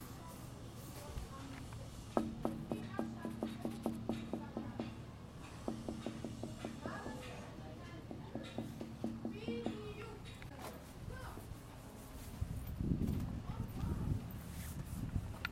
knocking on a wooden surface